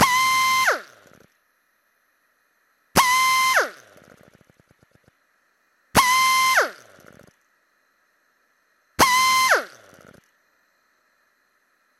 Pneumatic drill - Atlas Copco lbv45 - Start 4
Atlas Copco lbv45 pneumatic drill started four times.